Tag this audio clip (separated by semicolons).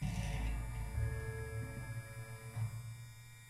Repeating; electric; motor; processing; shaver; tank